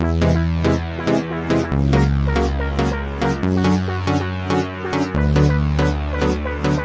Third version of my chiptune jamiroquai pathetic tribute (don't know if it's really a tribute, but I was thinking of their first album when I made that little shabby loop). All melodies are made with Synth1 vst.